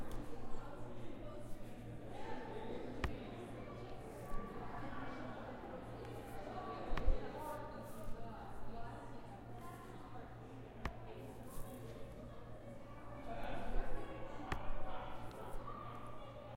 Laptop Shut & Open
Laptop shut and open
Laptop, open, shut